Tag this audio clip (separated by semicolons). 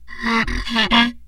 idiophone; daxophone; instrument; wood; friction